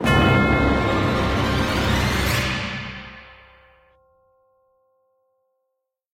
Chime of Doom
Big orchestral Chime playing C key with other instruments.
dark, doom, orchestra, effect, short, hit, low, movie, violins, chimes, cinematic, scary, chime, symphonic, tense, orchestral, bend-up, massive, up, strings, sting, film, accent